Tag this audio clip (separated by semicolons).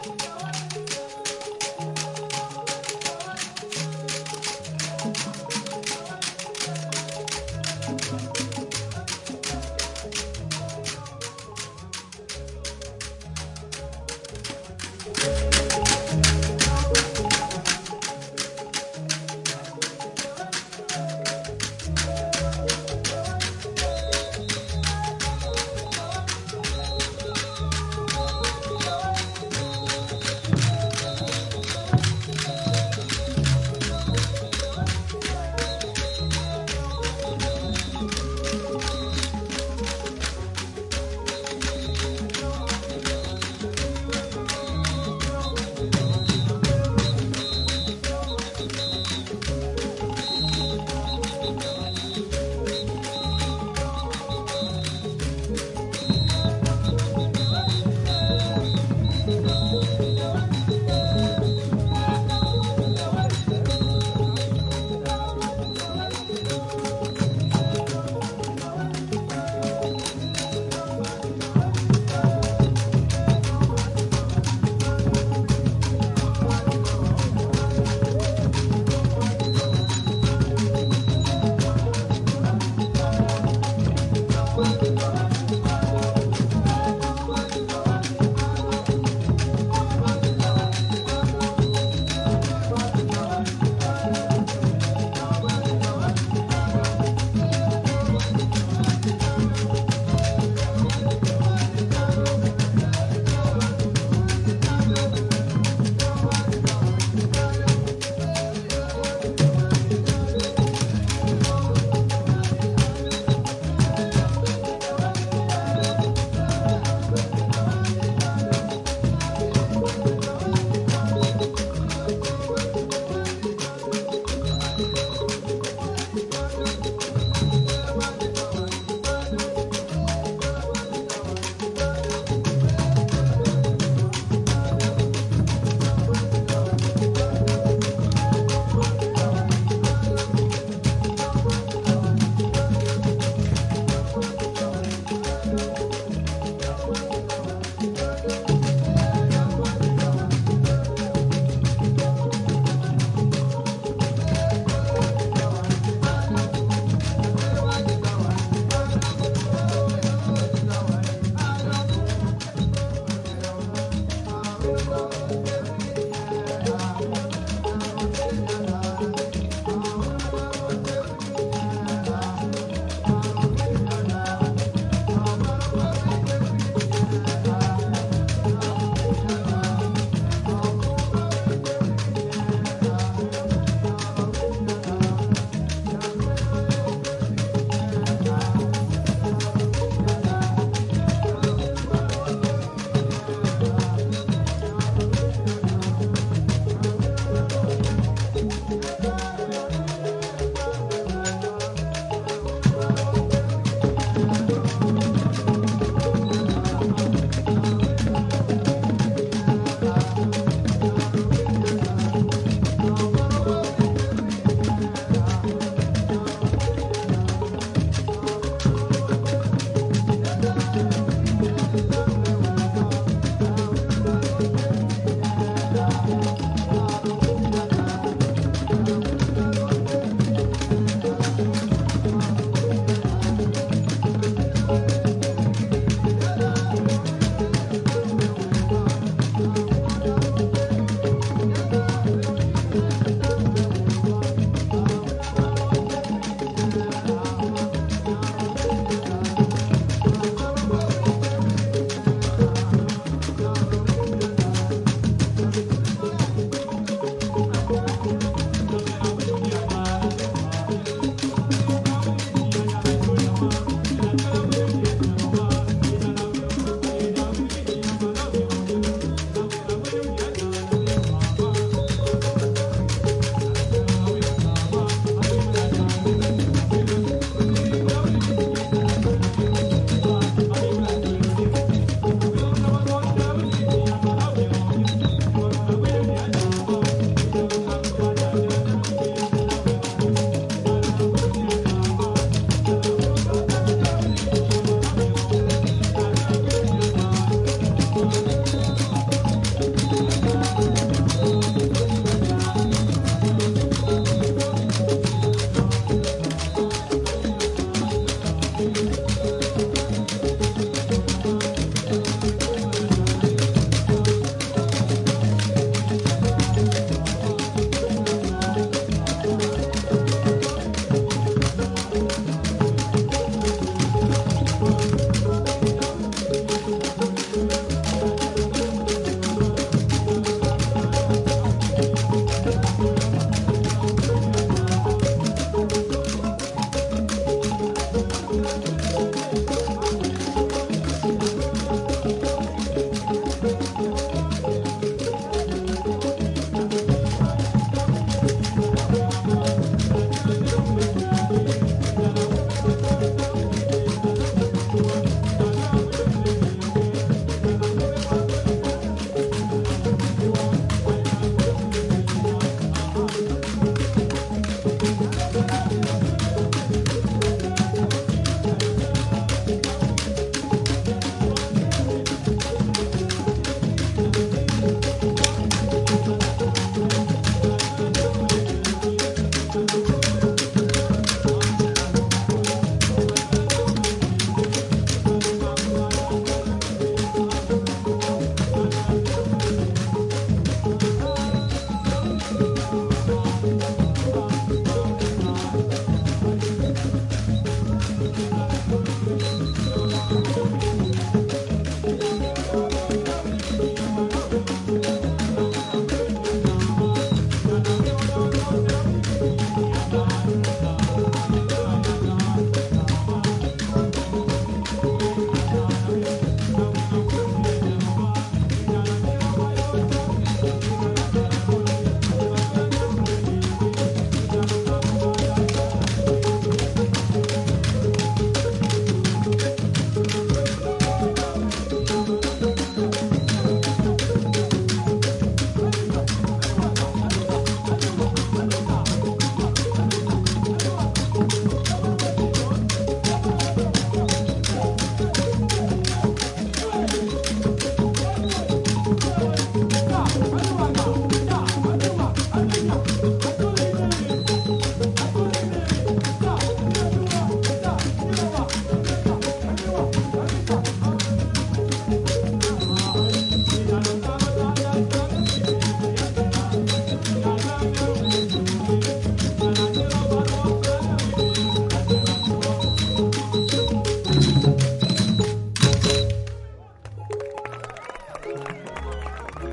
africa; Ndere; music; uganda